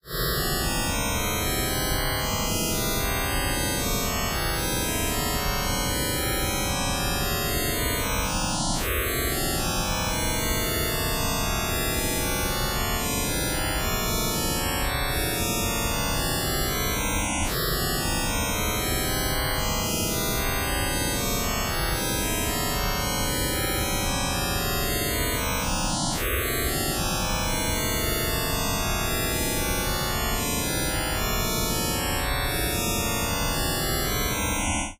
Prey at the alter of the holy quasar and find galactic salvation.